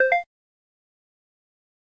A simple notification/jingle sound made with a synth plugin.